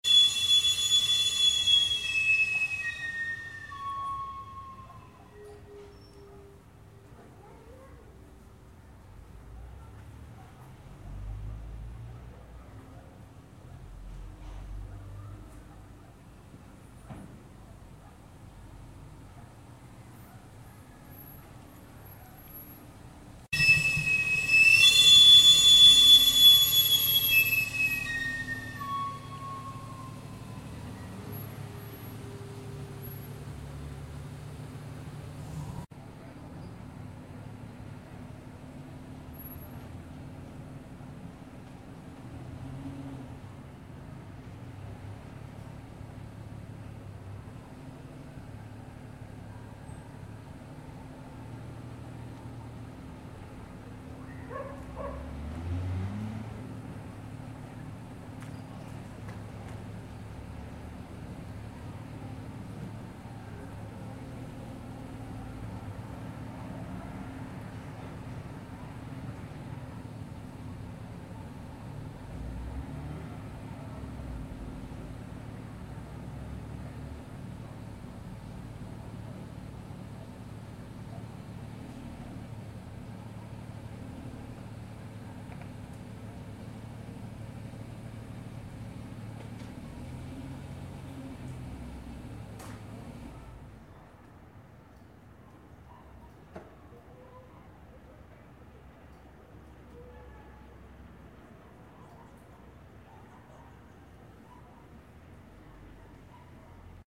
sound of a mobile stand of mexican deserts in a street. Recorded with AKG perception 120 and a Tascam cd-40 in Mono. Sonido de un camotero pasando por la calle
street, whistle, silbido, camotero, calle